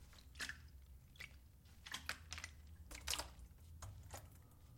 Prop Gallon Water
Foley - Props - Gallon of water
Mic- Sennheiser MKH-416
Interface (USB) Fast Track Pro
Software Pro Tools 8.0.5
Gallon, Water